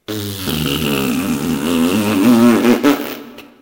Vocalised farting noise #1. Recorded and processed on Audacity 1.3.12
comedy, farts, vocalised